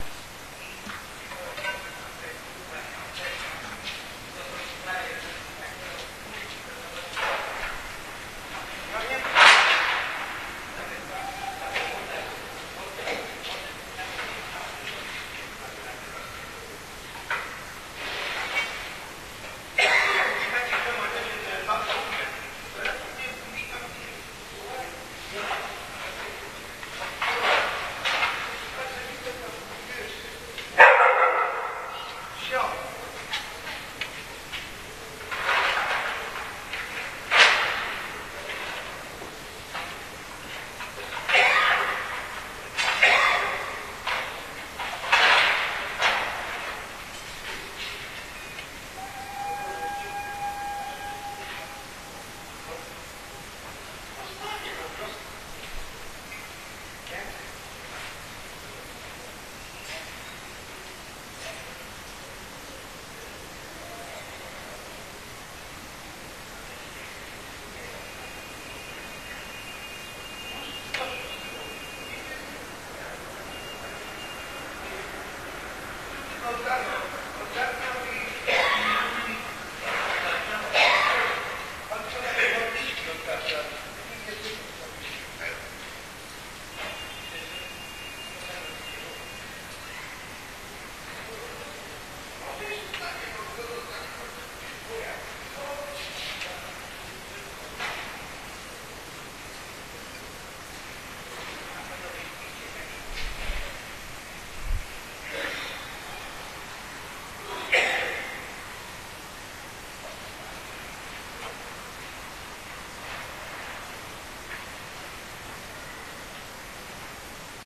18.03.2010: about 16.00 tenement courtyard ambience on Górna Wilda street on Wilda district in Poznań (Poland). general ambience of the courtyard. The first one warmer day in that year. There are audible: two janitors who are coughing, barking dog, some puff-puff sound (I have no any idea from where) and the traffic sound in the background.
ambience, barking, city, coughing, courtyard, field-recording, janitor, noise, poland, poznan, squel, voices, wilda